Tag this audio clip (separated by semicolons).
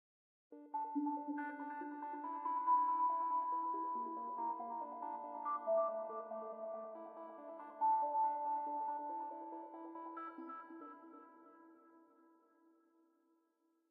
acid; synth; awesome